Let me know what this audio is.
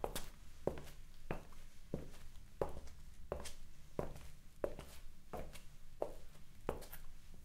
I decided to upload all of my sound design stuff where I am working or have worked on.
This is a series of hard sole footsteps on a stone tile floor I recorded for slicing it up to load it in a sampler.
Recorded with a Brauner Phantom Classic via an XLogic Alpha VDH pre-amp from Solid State Logic.